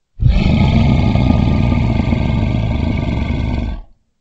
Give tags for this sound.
beast creature dragon growl growling intimidating monster roar vocalization

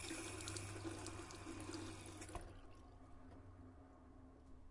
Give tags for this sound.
faucet water bathroom

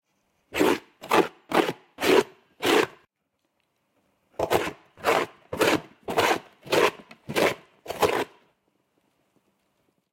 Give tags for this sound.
attack cardboard dog door noise scratch scratching shake wolf wood wooden

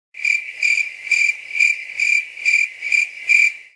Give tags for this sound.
awkward cleared cricket comic

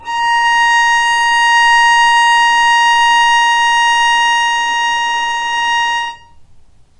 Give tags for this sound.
arco non vibrato violin

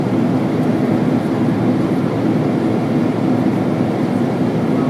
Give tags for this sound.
airport cabin airplane flight fly

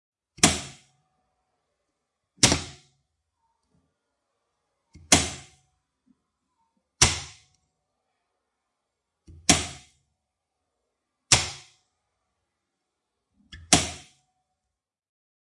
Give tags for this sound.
electrical light mechanical switch switching

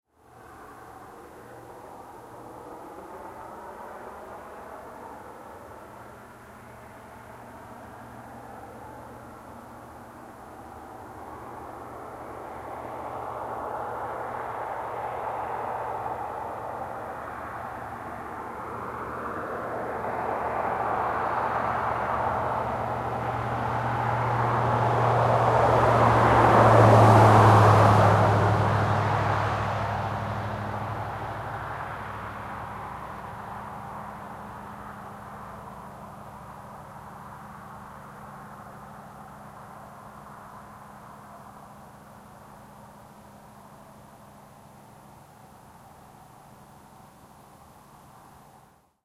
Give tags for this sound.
car driveby field-recording highway

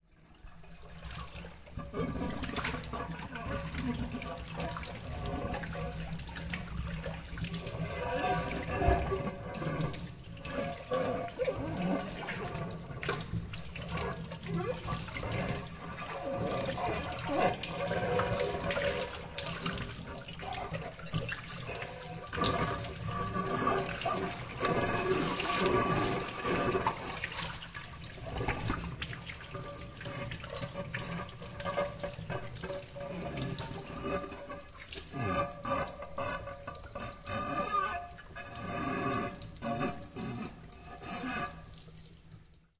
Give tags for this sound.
baking-dish glass kitchen percussion pyrex resonant

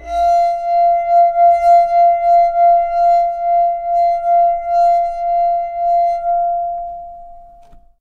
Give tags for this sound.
sing finger

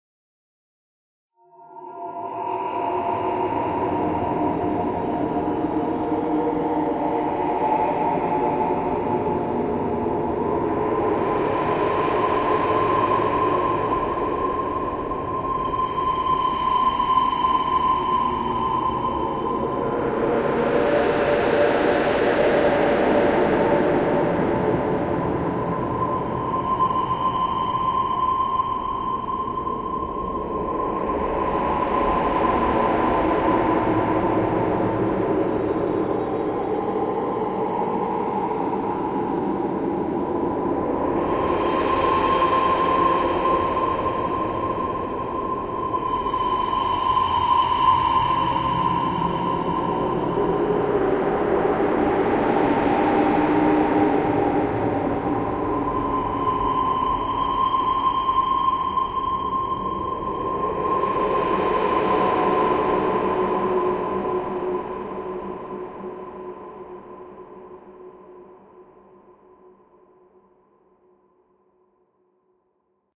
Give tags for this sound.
alien atmosphere cinematic creepy dark filter horror sfx suspense synthesized unnatural

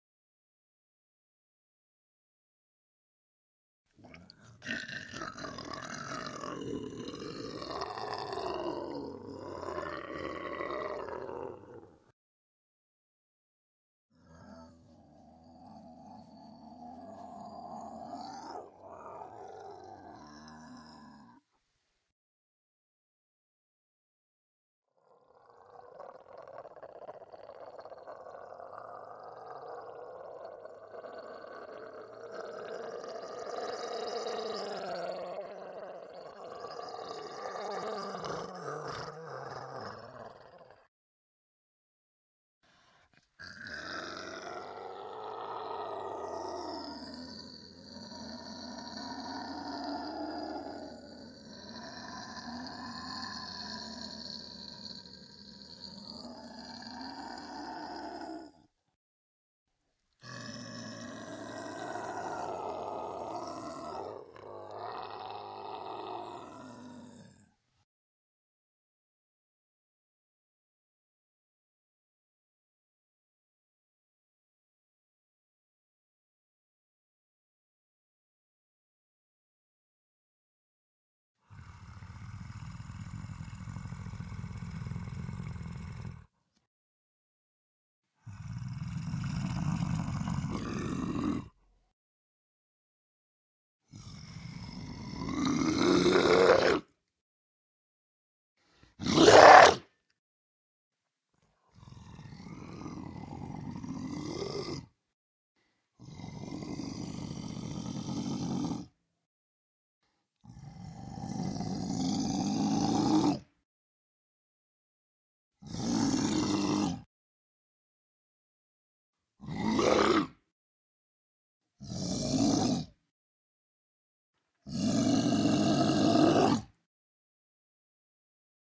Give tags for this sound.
beast creature growl horror moan monster roar undead zombie

